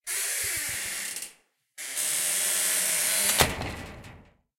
squeaky door hinge open and close 1
This is from my security screen door before applying lubricant to get rid of the creak/squeak. I used my Zoom H2n and then removed the ambient noises with Adobe Audition.
creak, creaky, screen-door, security-door, squeak